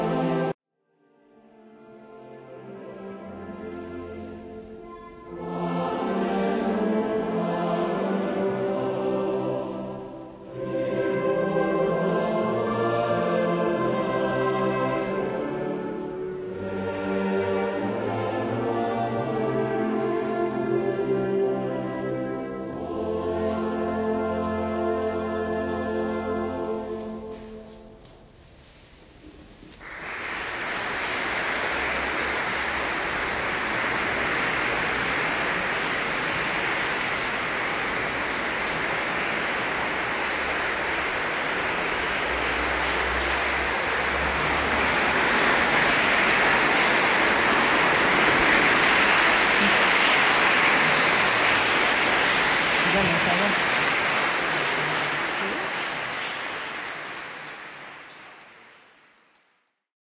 Choir temple November applause
November in the temple
chanting, Choir, Church, Field, field-recording, November, song, temple